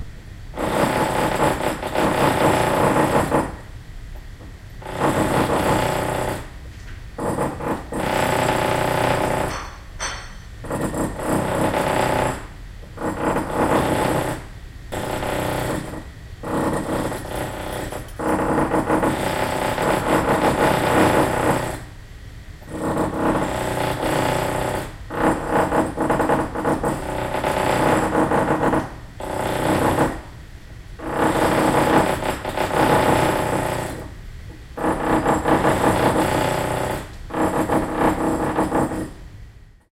A pneumatic air tool (jackhammer) was used to lower some concrete blocks on the exterior of our garage. I was inside the house recording it with a Zoom H2 recorder. So this was recorded through an insulated wall. This is a combination of the 2 previous recordings, so it's a bit more concentrated/intense.